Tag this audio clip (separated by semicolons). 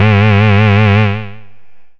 pulse; multisample